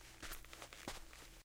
Walking softly on dirty floor in soft shoes